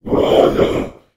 A sinister low pitched voice sound effect useful for large creatures, such as demons, to make your game a more immersive experience. The sound is great for making an otherworldly evil feeling, while a character is casting a spell, or explaning stuff.
arcade, brute, deep, Demon, Devil, fantasy, game, gamedev, gamedeveloping, games, gaming, indiedev, indiegamedev, low-pitch, male, monster, RPG, sfx, Speak, Talk, troll, videogame, videogames, vocal, voice, Voices